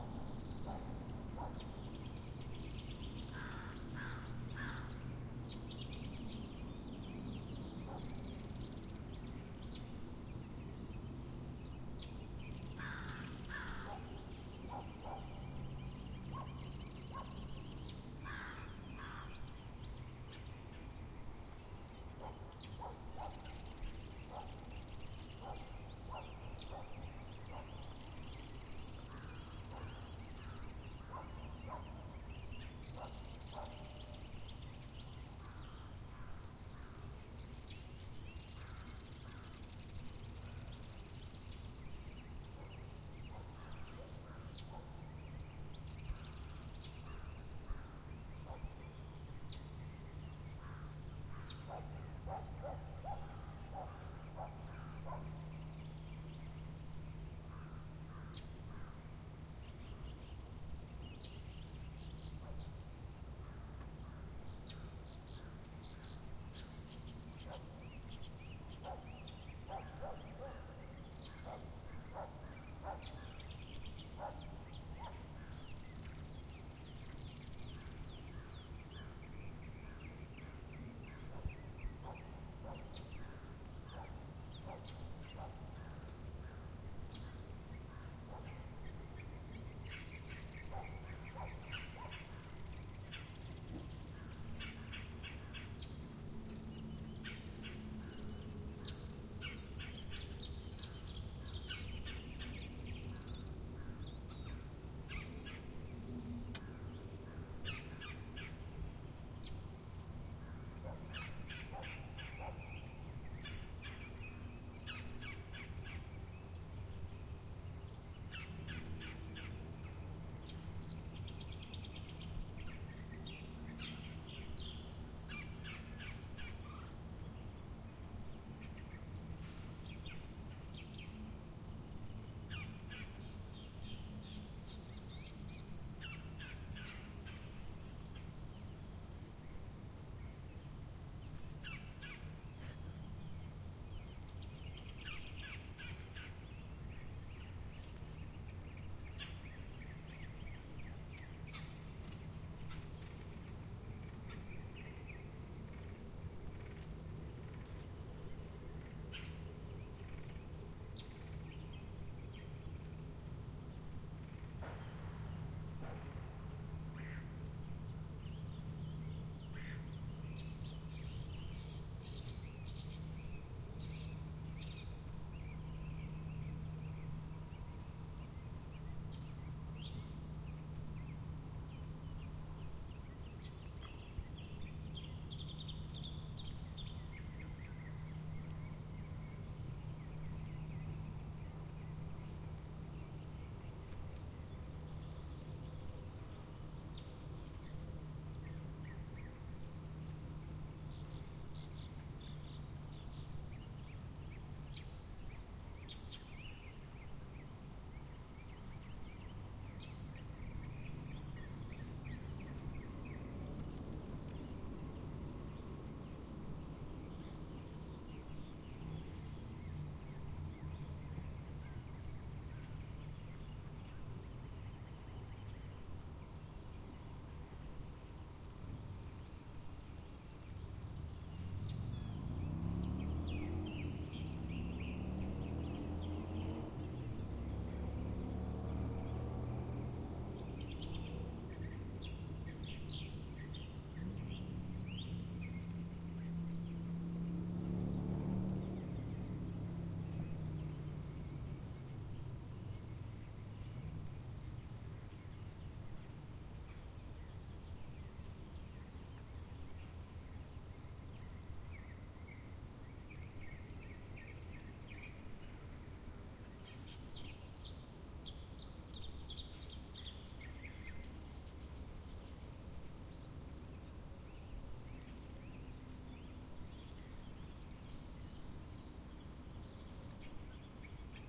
Lake Murray SC
This is around 7AM on an open dock facing the lake.
The seldom beeps are not present in the downloaded file.